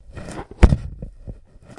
An AKG microphone placed inside a torso of a dummy.